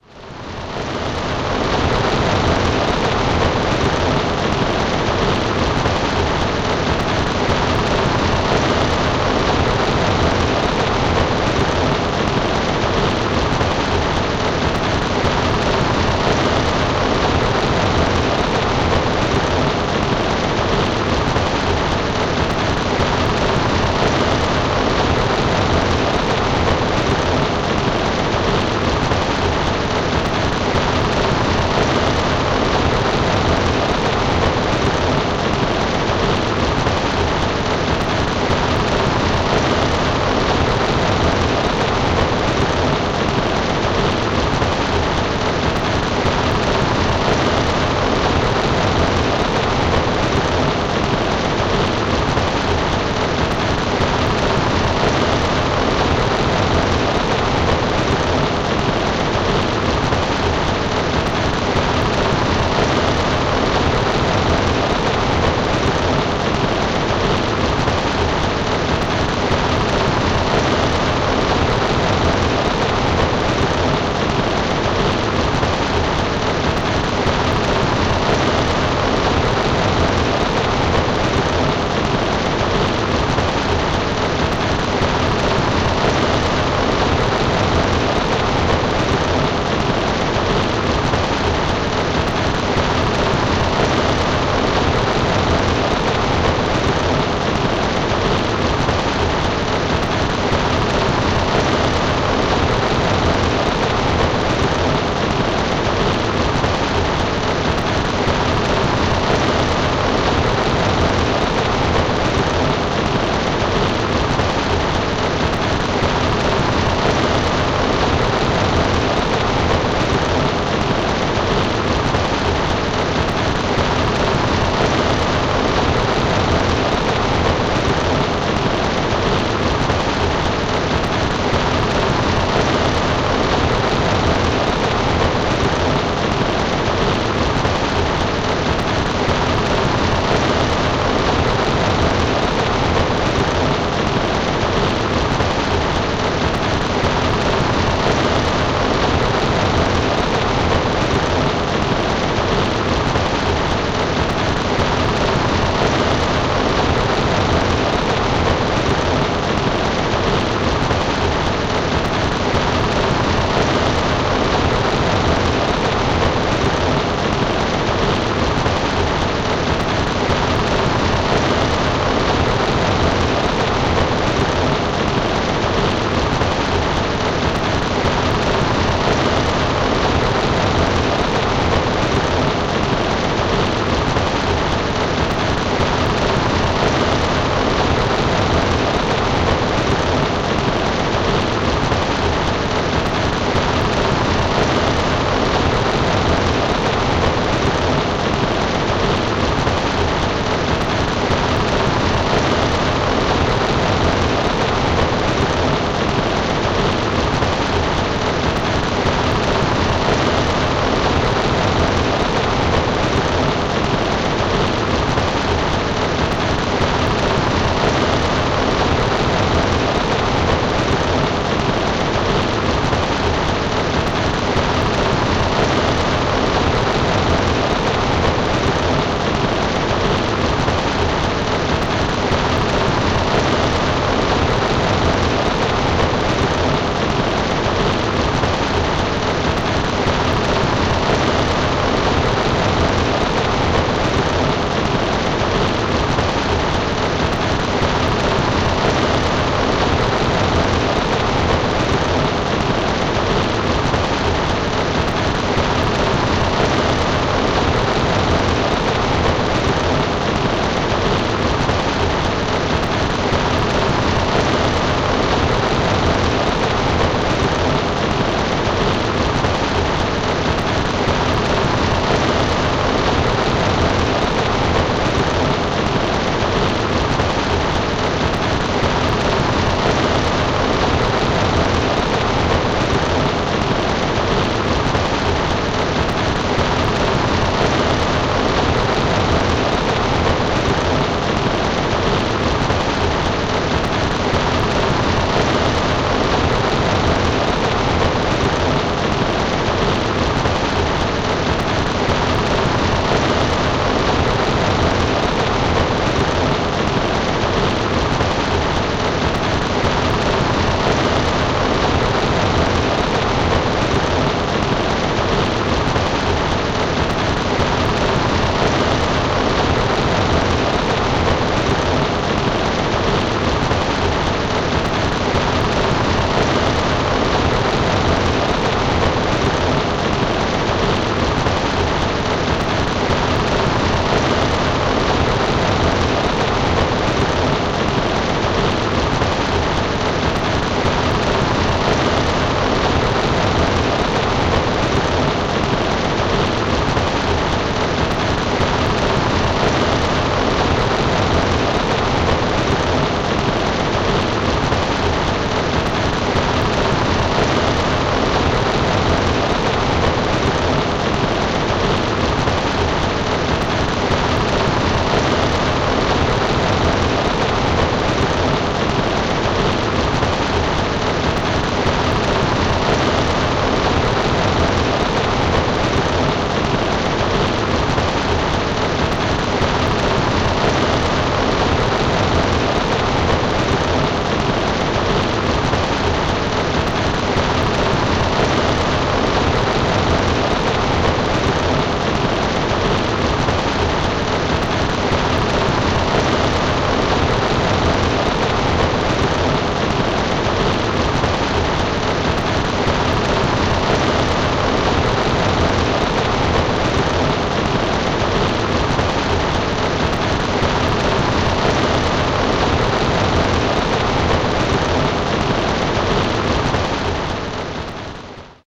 Remix: Robust rain. It's ready to put in your MP3 player on repeat, for blocking out noise and helping you sleep. I started with sample 28026, Heavy Rain, nicely recorded by Percy Duke and added my own edits for this application.